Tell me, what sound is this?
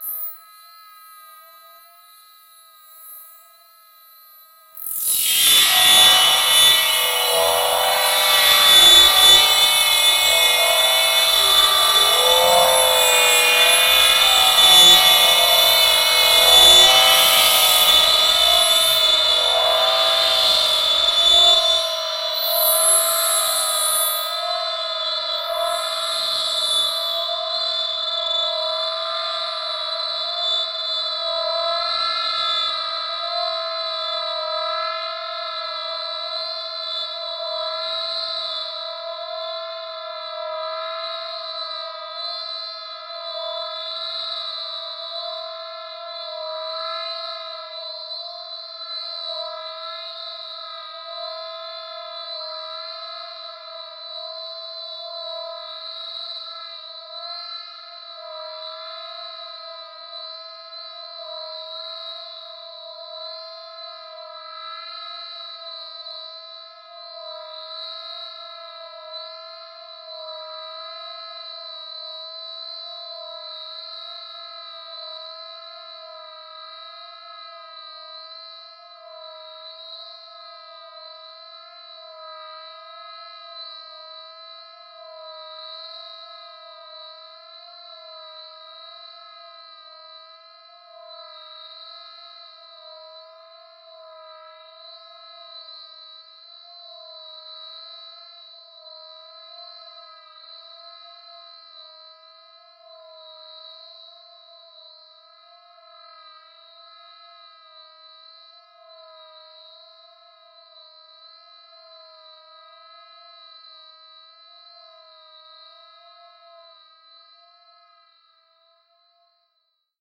VIRAL FX 03 - C6 - SPACE SWEEPING FREQUENCIES with long delay fades
Slowly sweeping frequencies with a very slowly fading away delay. Created with RGC Z3TA+ VSTi within Cubase 5. The name of the key played on the keyboard is going from C1 till C6 and is in the name of the file.
effect, fx, sci-fi, space